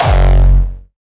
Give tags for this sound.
kick
studio

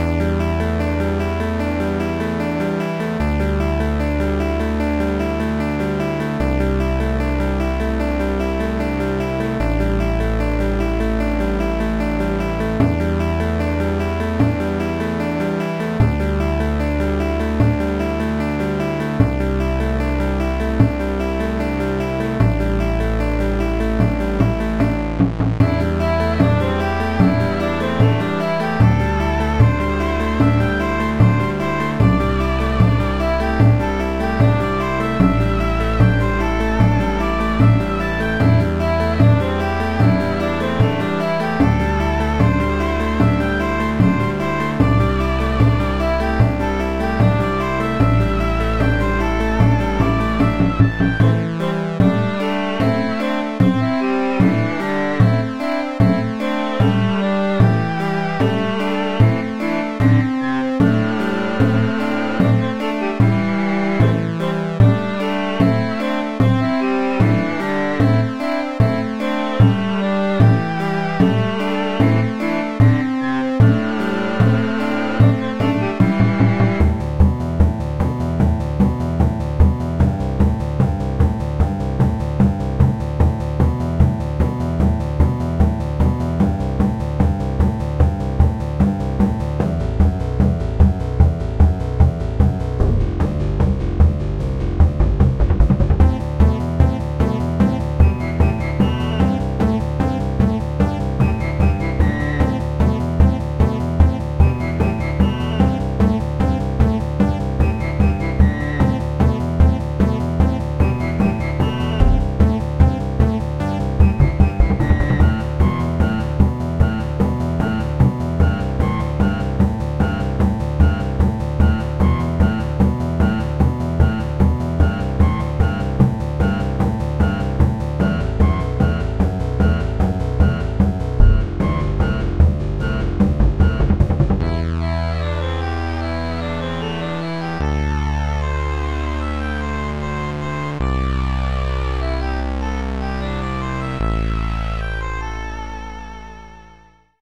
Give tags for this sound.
calm
droll
electro
funny
game
loop
music
ost
relaxing
soundtrack

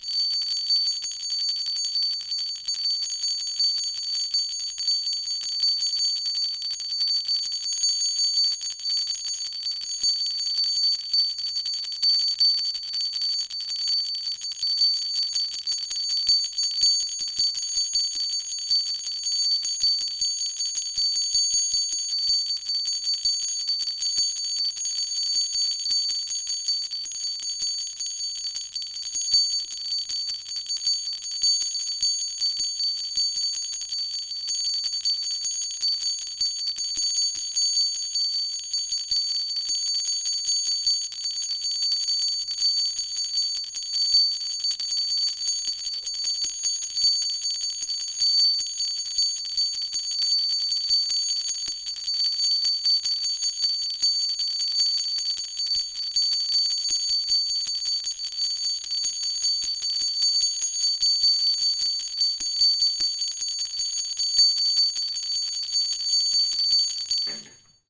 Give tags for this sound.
bell
buddhist
Japan
ringing
small

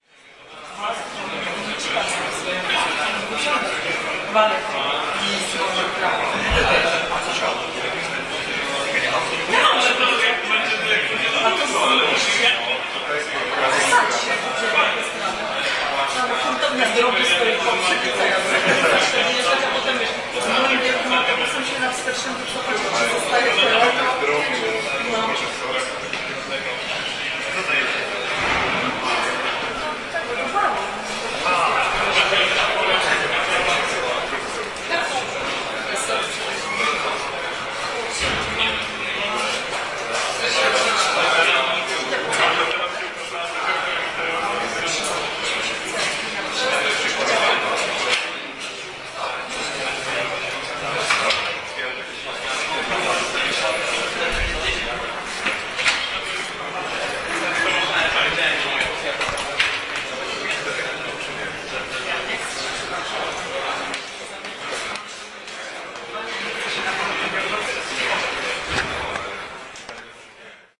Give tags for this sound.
collegium-historicum
corridor
hubbub
noise
people
poland
poznan

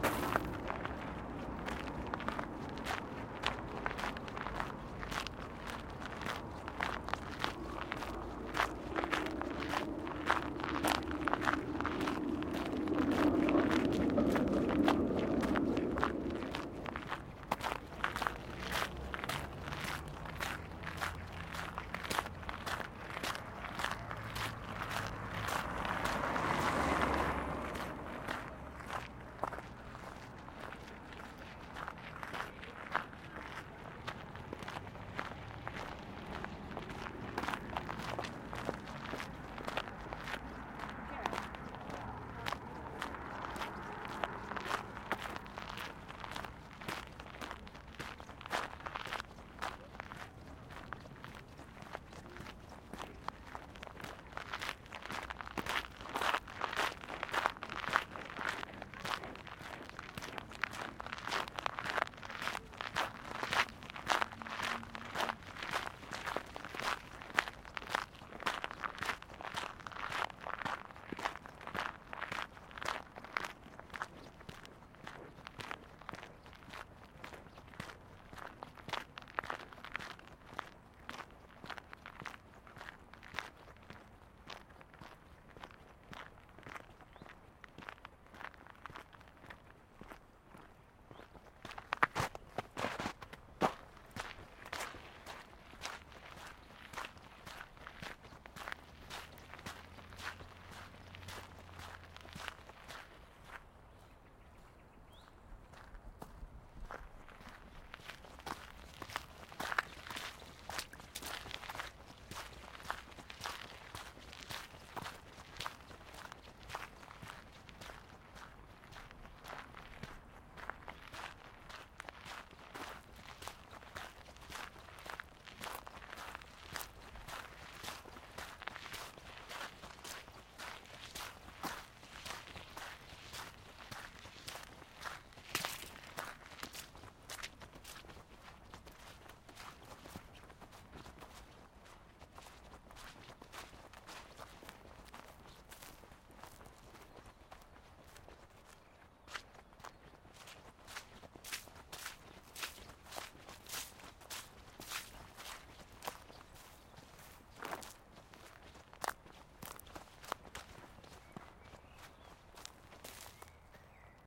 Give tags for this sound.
gravel
pedestrian
traffic
walking
steps

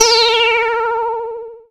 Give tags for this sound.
8bit
animation
arcade
cartoon
film
game
games
magic
movie
nintendo
retro
video
video-game

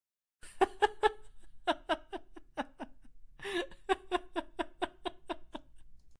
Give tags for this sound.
giggle
happy
laugh

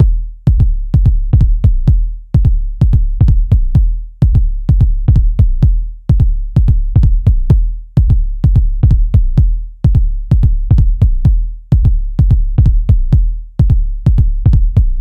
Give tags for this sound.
design
2BARS
Techno
groovy
drum
loop
bass
4
Low
percs
BARS
percussion-loop
rhythm
beat
drum-loop

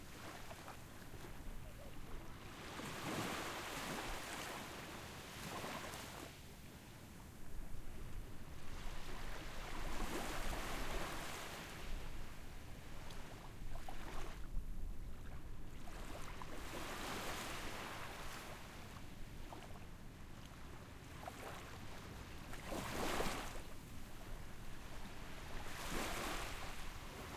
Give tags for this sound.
water
chill
sea

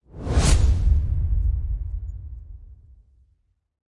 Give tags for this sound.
Transition
sfx
sound
woosh
swish

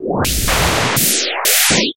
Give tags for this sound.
digital
noise
synthesis
synth
electronic
synthetic
weird
sound-design
synthesizer
future

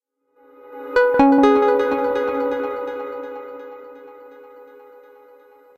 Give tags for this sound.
analog
delay
intro
synth

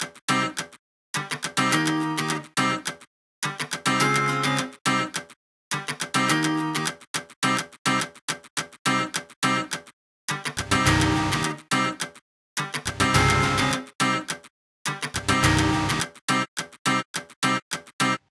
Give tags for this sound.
acoustic
bpm
real
dance
105
cool
tempo
steel
gypsy
guitar
loop
sweet

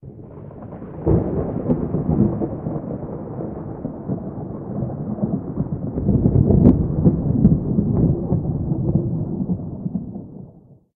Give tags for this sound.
storm
thunder
weather

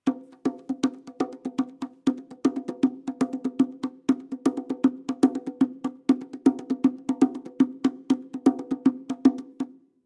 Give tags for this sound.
bongo
drum
environmental-sounds-research
percussion

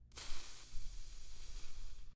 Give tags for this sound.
agua
estallido
fuga

